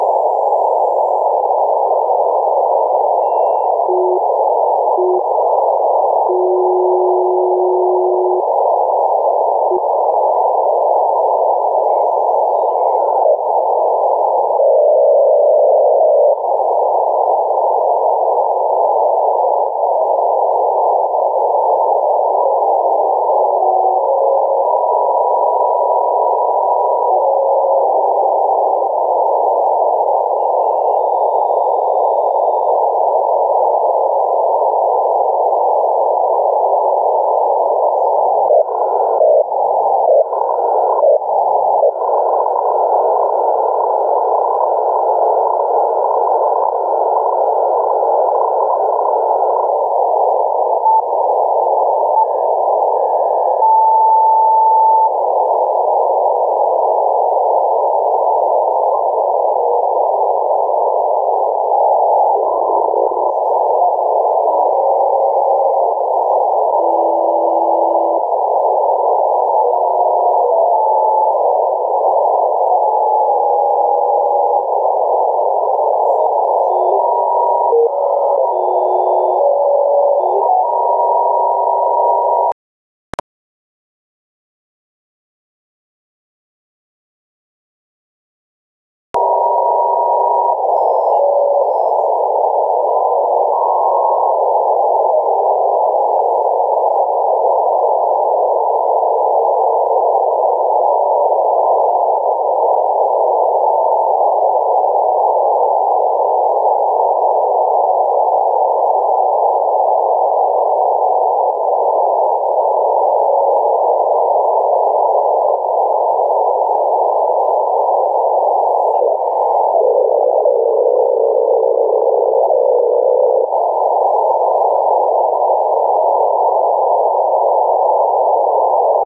SDR Recording 001
Recorded radio scanning noise.
abstract, ambient, digital, electric, electronic, freaky, noise, radio, scane, sci-fi, space